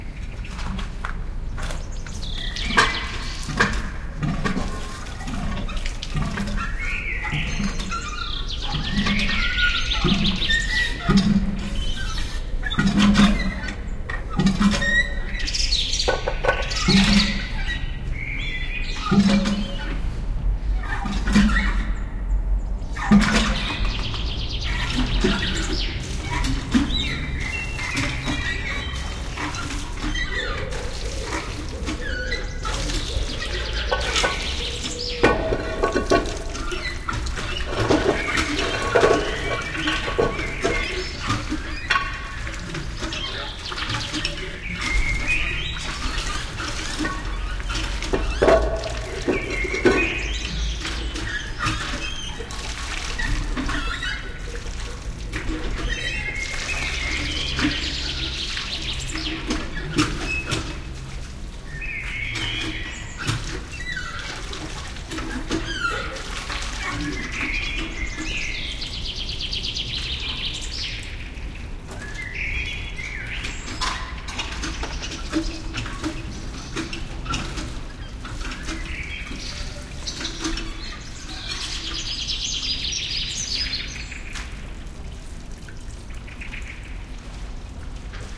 Old Fashioned Waterpump
The sound of a cast iron waterpump being operated by our neighbour. Sennheiser MKH40 microphones, Shure FP-24 preamp into Edirol R-44 recorder.
pump, water, field-recording, waterpump